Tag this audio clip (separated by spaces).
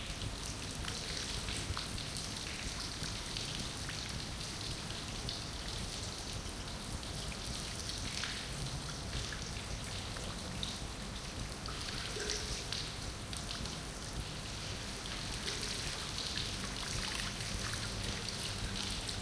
binaural cathedral cloisters fountain garden peace peaceful summer